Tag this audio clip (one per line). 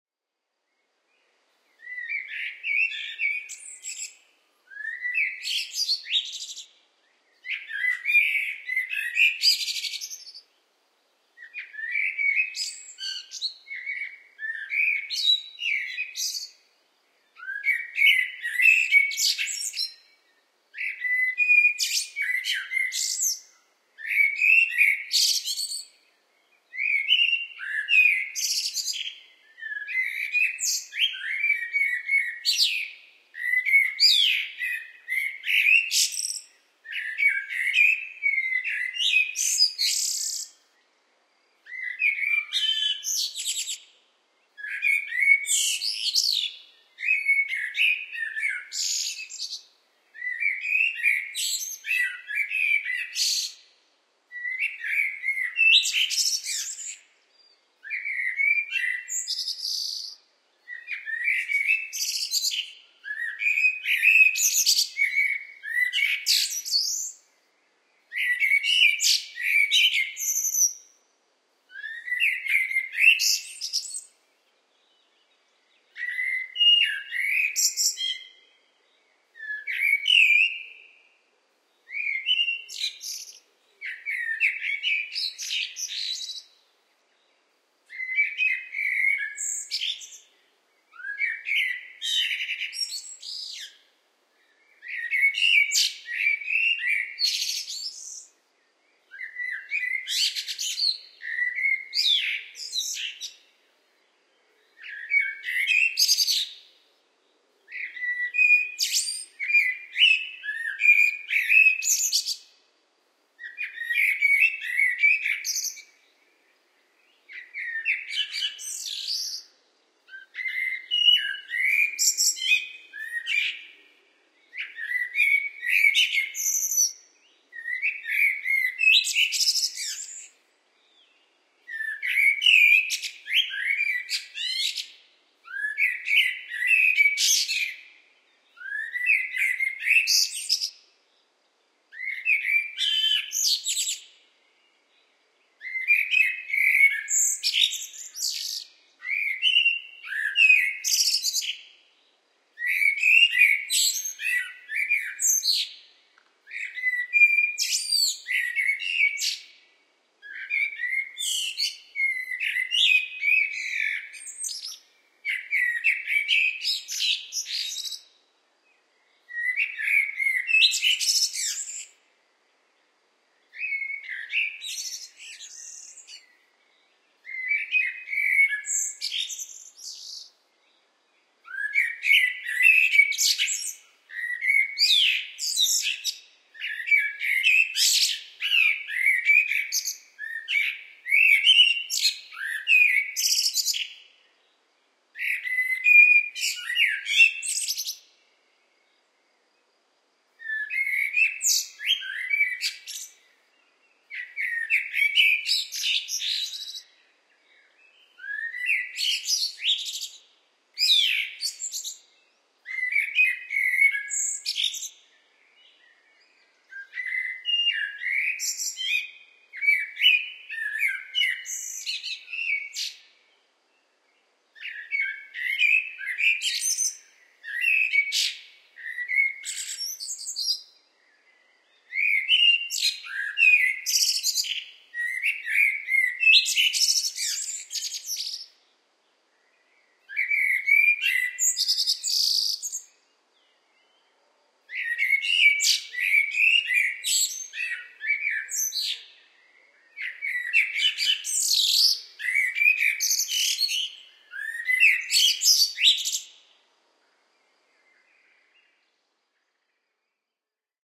ambiance
ambience
ambient
atmosphere
bird
birds
birdsong
blackbird
evening
field-recording
fieldrecording
forest
france
merle
nature
singing
spring